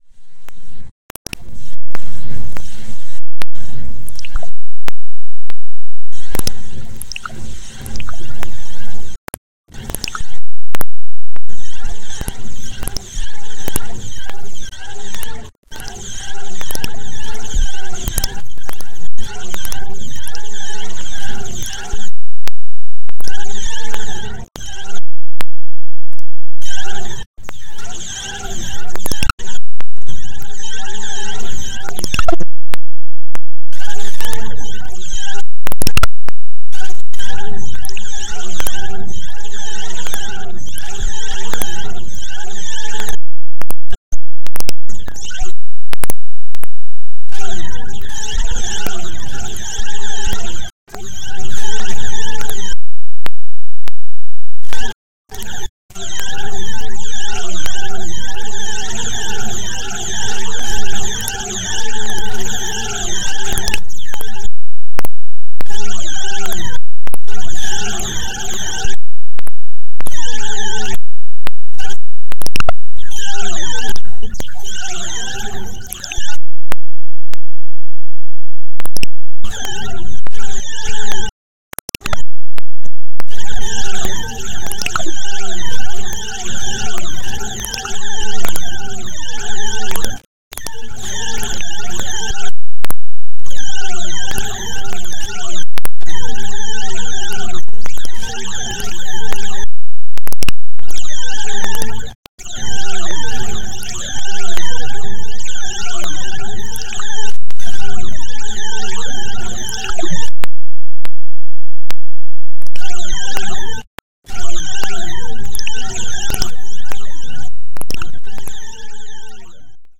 Noise Garden 21
1.This sample is part of the "Noise Garden" sample pack. 2 minutes of pure ambient droning noisescape. Clicks and cracks with some weird noises.
drone, effect, electronic, noise, reaktor, soundscape